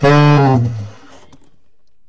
Tenor fall d2
The format is ready to use in sampletank but obviously can be imported to other samplers. The collection includes multiple articulations for a realistic performance.
vst, sampled-instruments, tenor-sax, woodwind, jazz, sax, saxophone